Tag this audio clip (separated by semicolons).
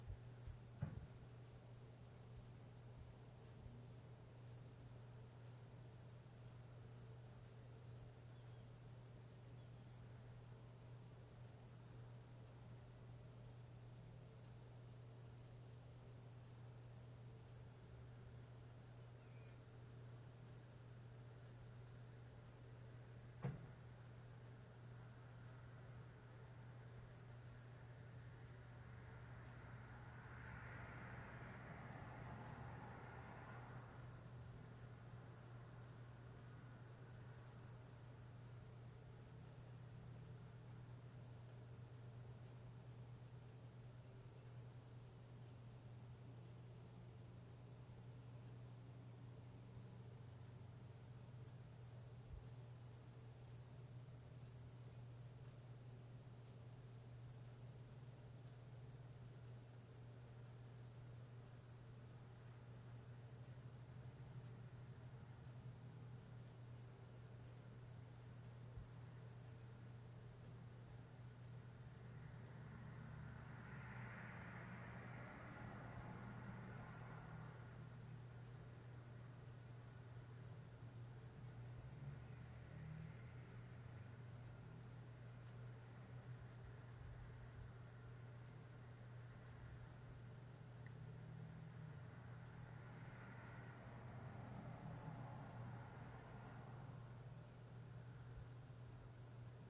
background; ambience; field; recording